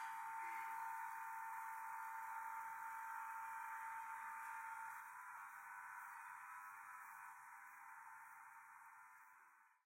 Buzzing Lights
some low quality buzzing from the kitchen lights
electricity, hum, light